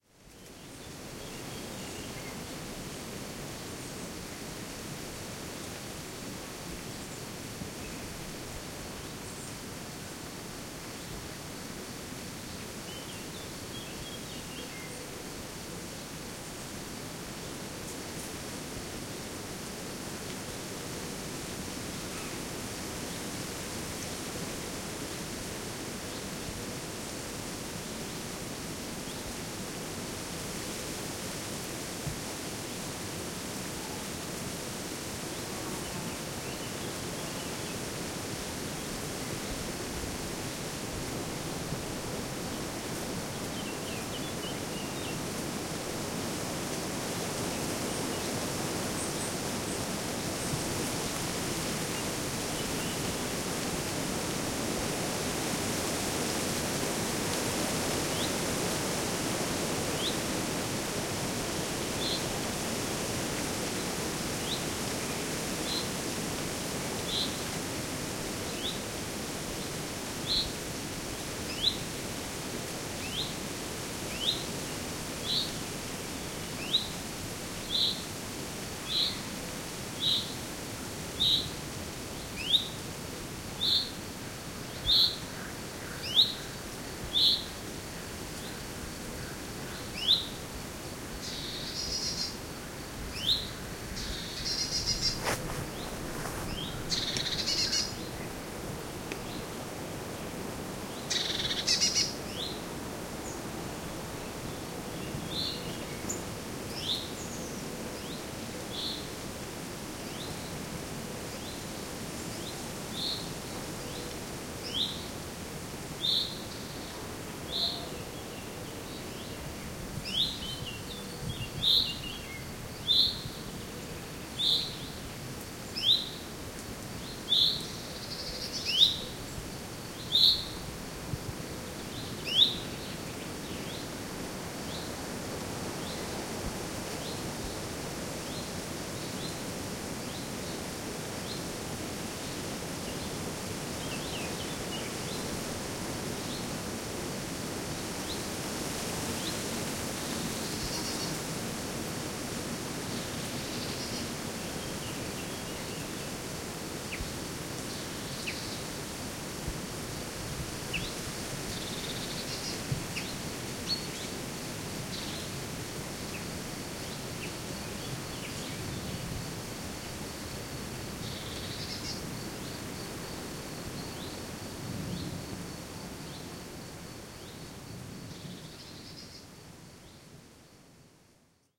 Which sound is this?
Ambiance in a forest in France, Gers.wind in the trees, many birds, distant frogs, distant streams.Recorded A/B with 2 cardioid microphones schoeps cmc6 through SQN4S mixer on a Fostex PD4.
ambiance, bird, country, day, forest, france, frogs, gers, tree, wind